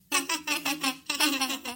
Sonido de ardillas riéndose
ardillas, laugh, risa, squirrel